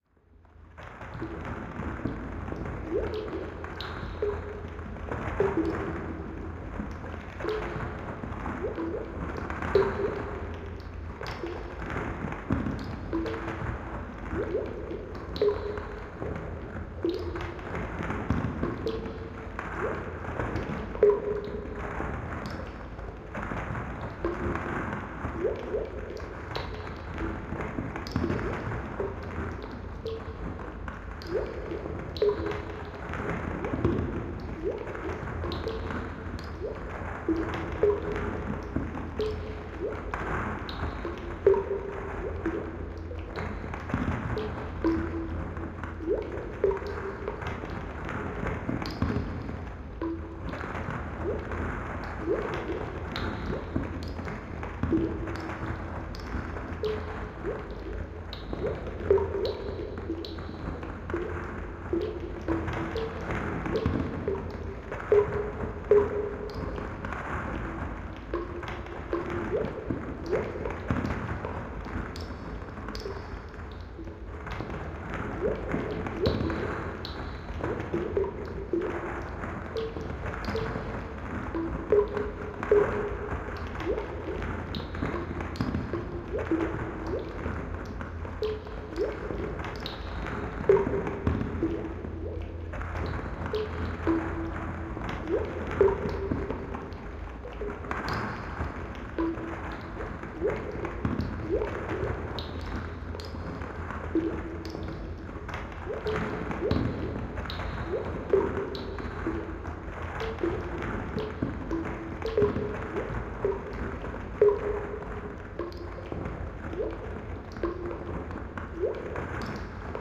Atmosphere - Stonecave with water (Loop)
I´ve made this atmo with padshop pro. If you wanna use it for your work just notice me in the credits.
Check out my other stuff, maybe you will find something you like.
For individual sounddesign or foley for movies or games just hit me up.